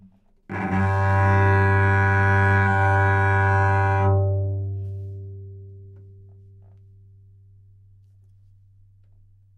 Cello - G2 - other
Part of the Good-sounds dataset of monophonic instrumental sounds.
instrument::cello
note::G
octave::2
midi note::31
good-sounds-id::290
dynamic_level::f
Recorded for experimental purposes
cello, G2, good-sounds, multisample, neumann-U87, single-note